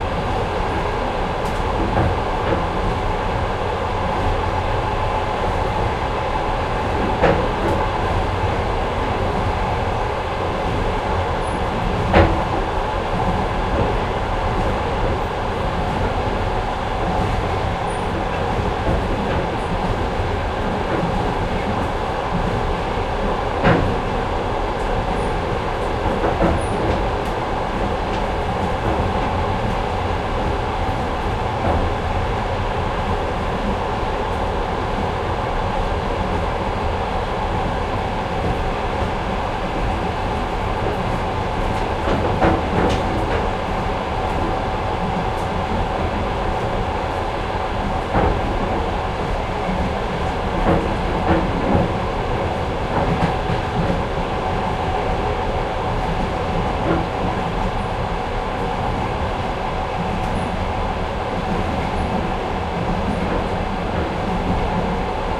Atmosphere in the cold tambour of the passenger wagon. Wheels rolling on the rails. The thunder wagon hitch.
Recorded 01-04-2013.
XY-stereo, Tascam DR-40, deadcat